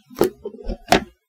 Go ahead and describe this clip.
open tupperware
opening a tupperware
container, open, Tupperware